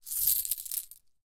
Handling coins, and pouring them back and forth between hands.